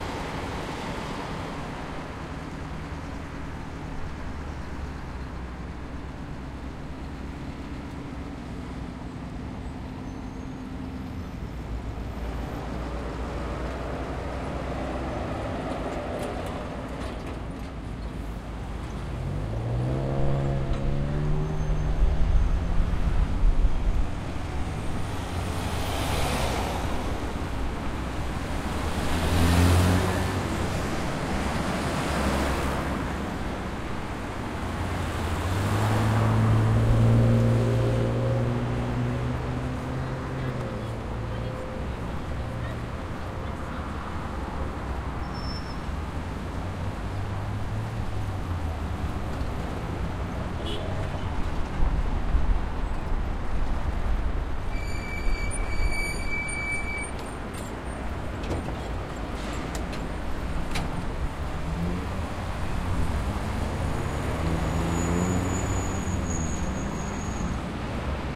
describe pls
crossroad Jukova st. - Dumskaya st. (Omsk)
Crossroad on Jukova - Dumskaya streets at 02pm. Noise of cars. Omsk, Russia. Recorded 25.09.2012.
street, cars, traffic, crossroad, Omsk, noise, city, trafficlight, Russia